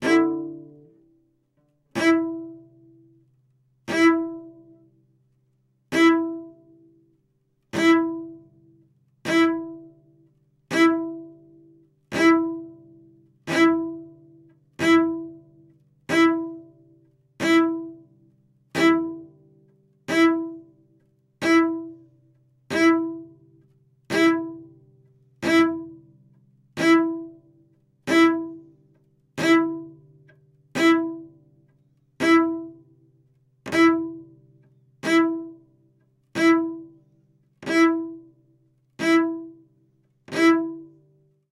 Concrete Cello 06 Arco
The "Concrete Cello" pack is a collection of scraping, scratchy and droning improvisations on the cello focussing on the creation of sounds to be used as base materials for future compositions.
They were originally recorded in 2019 to be used in as sound design elements for the documentary "Hotel Regina" by director Matthias Berger for which I composed the music. Part of the impetus of this sampling session was to create cello sounds that would be remiscent of construction machines.
You can listen to the score here :
These are the close-micced mono raw studio recordings.
Neumann U87 into a WA273 and a RME Ufx
Recorded by Barbara Samla at Studio Aktis in France
bow, Cello, concrete, design, film, imitation, instrument, mono, motor, object, objet-sonore, ponticello, quartet, raw, score, scrape, sound, string, sul